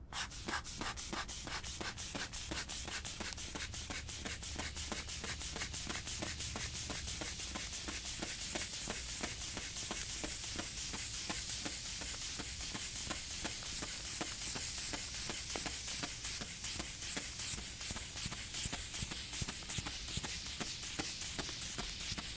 A blood pressure cuff pumping.
blood, doctor, hospital, pressure